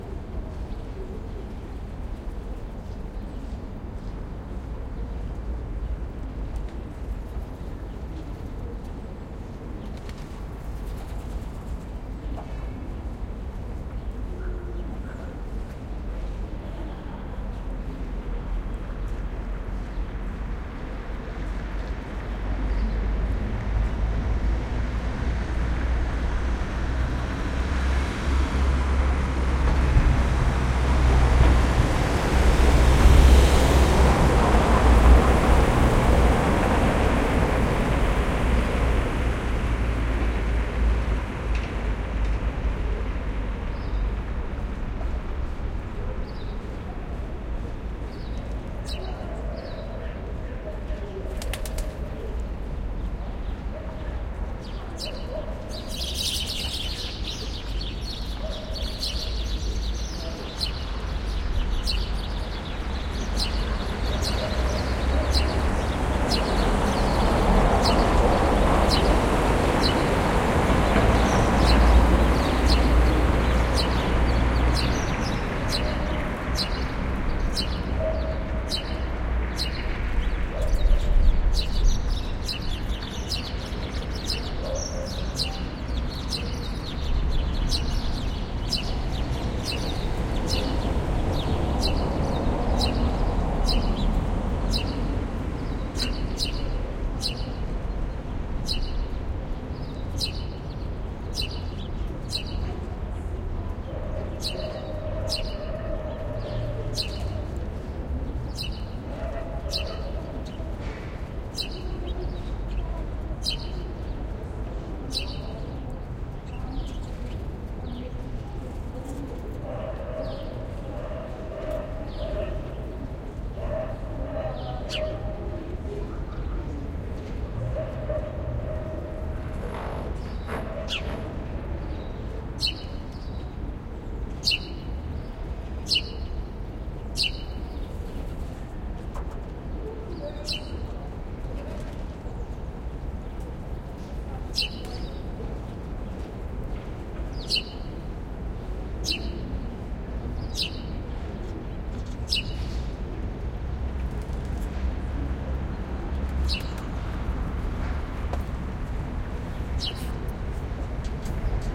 ambience - narrow street from above - ORTF wide cardioids AKG414
ORTF, recording, 414, field